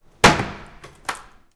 Grabación del sonido de cerrar una taquilla del campus Upf-poblenou. Grabado con zoom H2 y editado con Audacity.
Recording of the sound of a locker in Upf-Poblenou Campus. Recorded with Zoom H2 and edited with Audacity.